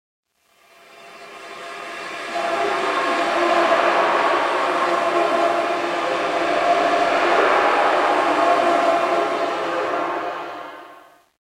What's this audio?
deep effect 2
I recorded more than 10 sounds while cooking food. I used one recording from inside a train and finally I recorded sounds on a bridge while cars were driving by. With these sounds, software and ways I have discovered studying at Sonic College Denmark, I created these 3 sounds. I am studying to become a sound designer and if you like sounds like this I have very many in my soundbanks. 100 % made by me.